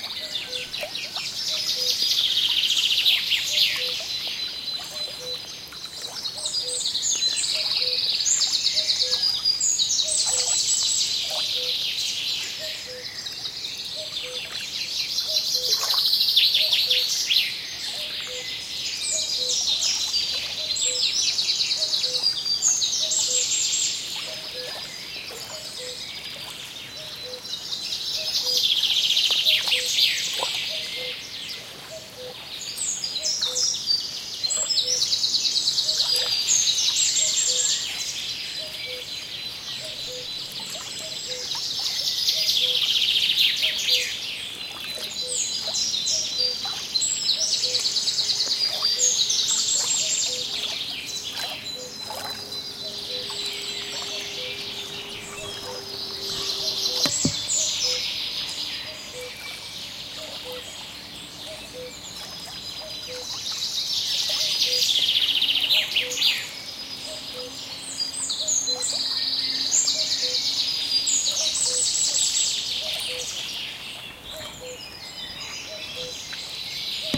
recorded at the river near my living place, you can hear some birds like a cuckoo etc. recorded with ZOOM H4n Digital Recorder, using the internal XY-Microphones